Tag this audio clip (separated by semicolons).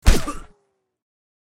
body; body-hit; bullet-hit; hit; kicked; man; punch; slapped; stab